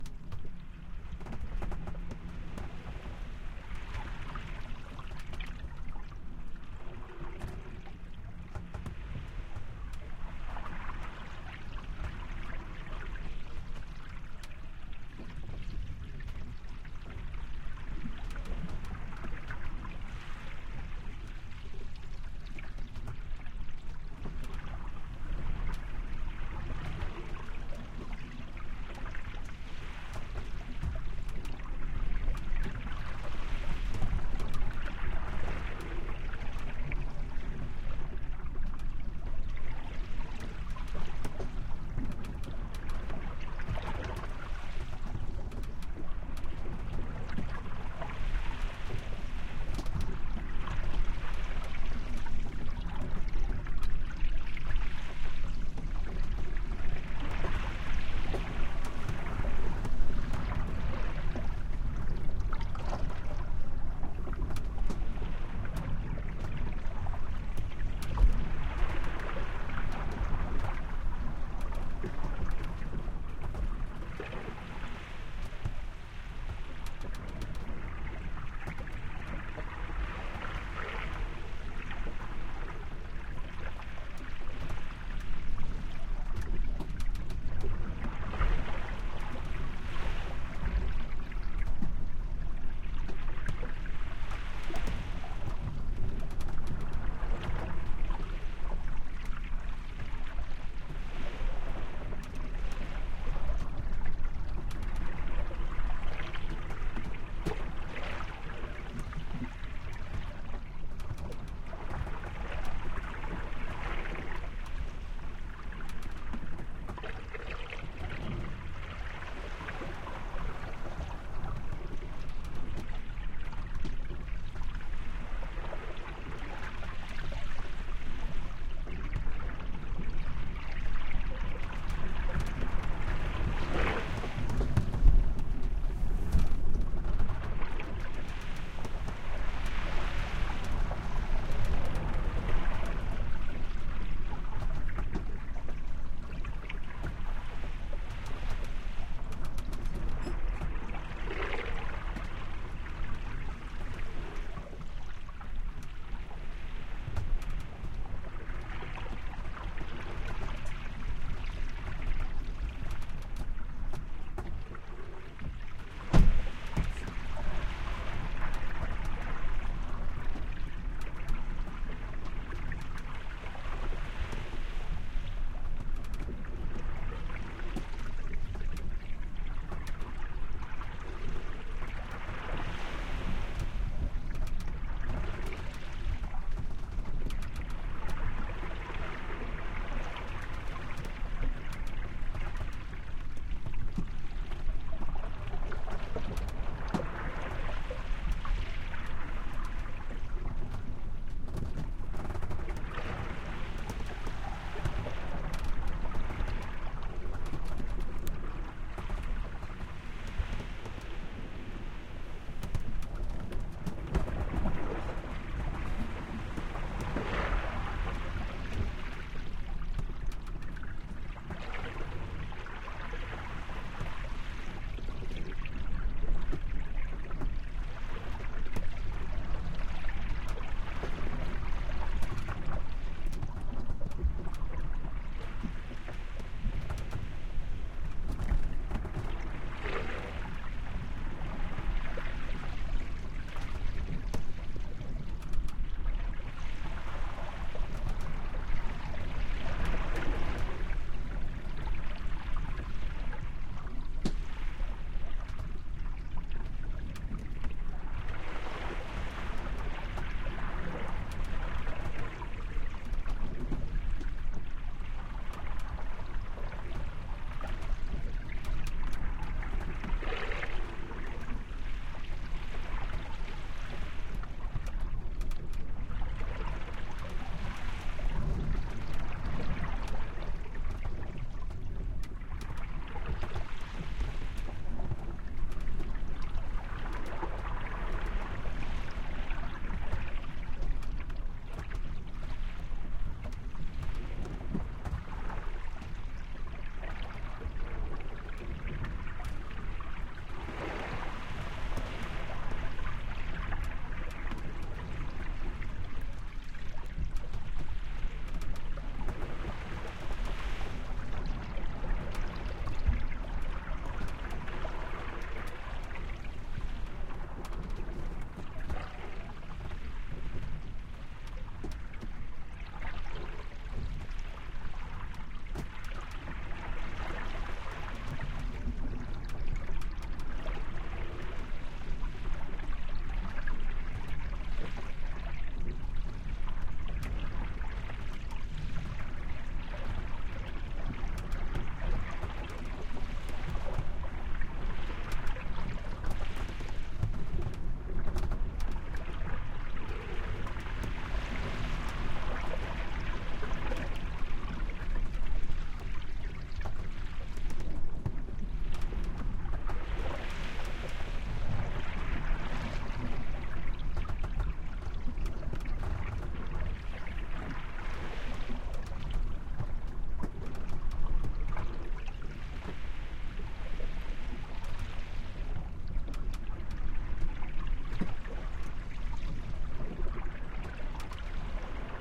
Recorded with a Sony PCMM10 below the waterline aboard my sailboat off the east coast of the US.